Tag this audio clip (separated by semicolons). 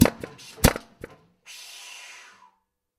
pressure; one-shot; billeter-klunz; power-hammer; metalwork; 1bar; tools; exhaust-vent; crafts; labor; 80bpm; machine; motor; air; work; forging; blacksmith